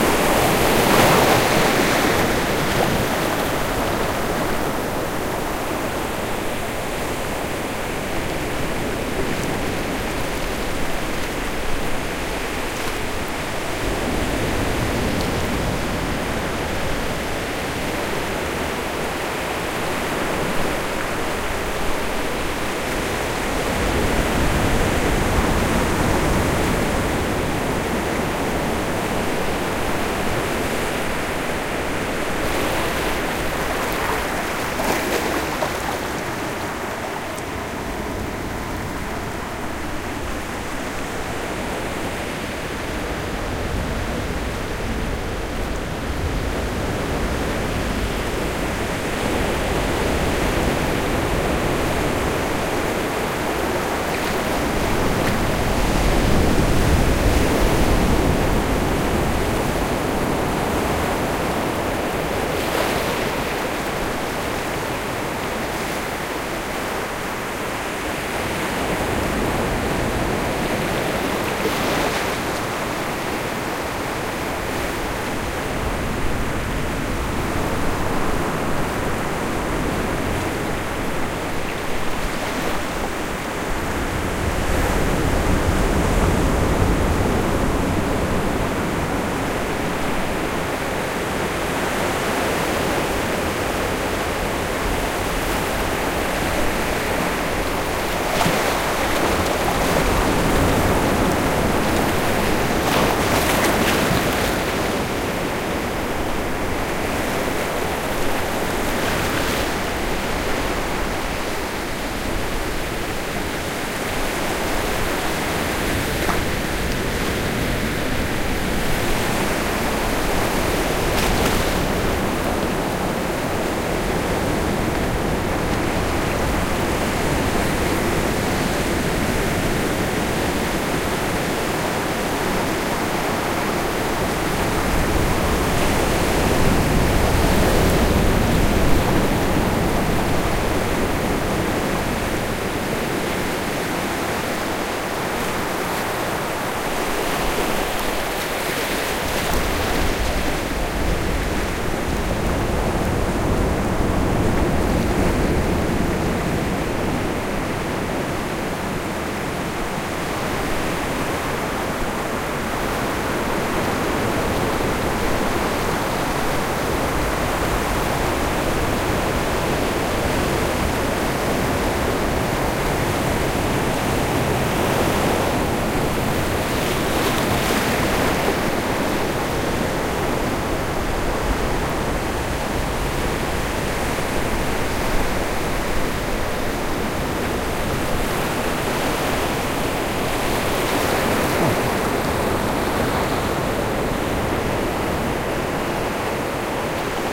Oceanic waves breaking at San Pedrito Beach (Todos Santos, Baja California S, Mexico). Soundman OKM mics, Olympus Ls10 recorder